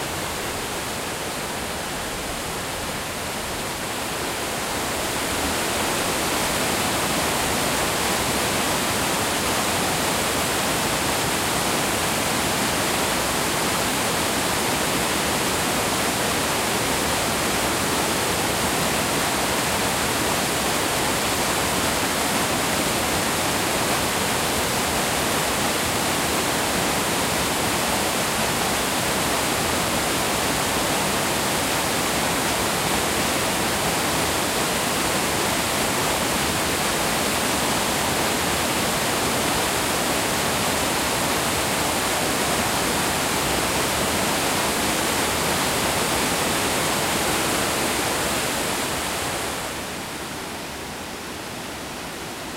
Captured from above at one of the waterfalls in Mount Rainier in Washington. Captured with a Tascam DR-40.